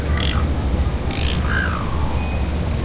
bali starling01
Quiet call from a Bali Starling. Recorded with a Zoom H2.